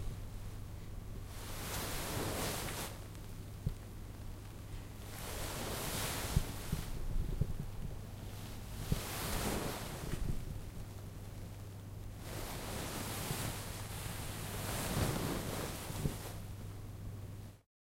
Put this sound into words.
Sitting down in a chair in a satin dress